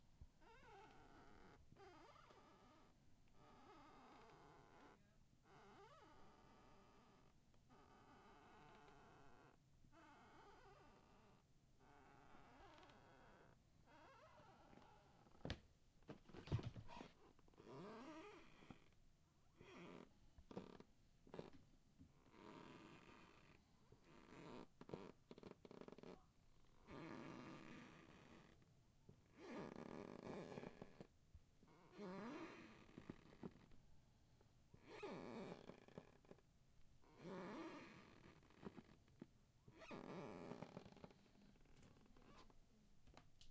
squeaky floor steps 01
squeaky floor & steps h4n & rode mic
steps, hardwood, creaky, floor, squeaky, footstep, squeaking